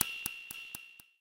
Glitched snare drums. The original samples were uploaded by user pjcohen.